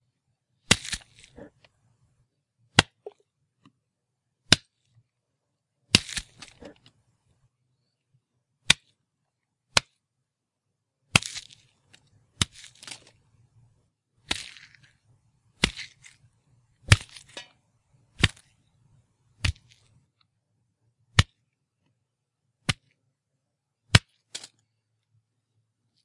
Grose nose punches.
The sound of nasty juicy punches, maybe someone's nose being smashed, or just a good solid punch to the mouth. made with lettuce, and a paper towel.
fight sounds recorded for your convenience. they are not the cleanest of audio, but should be usable in a pinch. these are the first folly tests iv ever done, I hope to get better ones to you in the future. but you can use these for anything, even for profit.
attack, bamboo, bodie, combat, face, fight, hand, hurt, kill, man, punch, superman, swhish, weapon